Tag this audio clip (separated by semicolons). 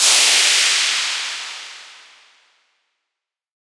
convolution; space-designer; ir; reverb; uad